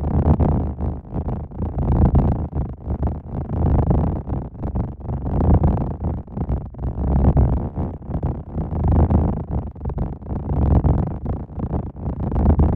14-drums or bass #2
"Interstellar Trip to Cygnus X-1"
Sample pack made entirely with the "Complex Synthesizer" which is programmed in Puredata
pd, rare, puredata, idm, analog, experimental, ambient, modular